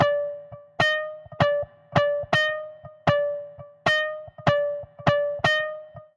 Live Trampbass Gut 07
bass guitar.skankified.
bass, free, guitar, live, organ, session